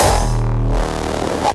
Hardstyle Kick 7 (with percussion)
Drum, Hardstyle, Layered, Rawstyle